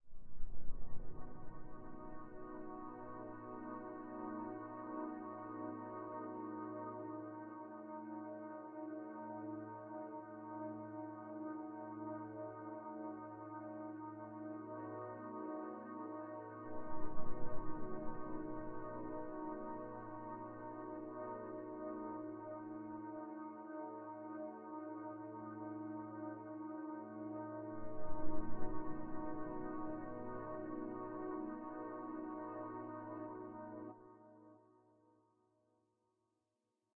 Ambient Bright 1
A bright ambient tone
Space, Ambient, Aliens